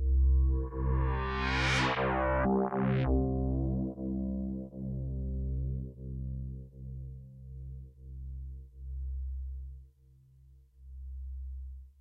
C2 Morph Bass
Recorded with Volca FM and Microbrute, processed with DOD G10 rackmount, Digitech RP80 and Ableton